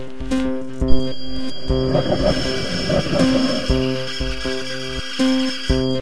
2-bar loop that combines an elastic electronic rhythmic bit with a
metallic ringing made from processing a bicycle bell field-recording;
made with Adobe Audition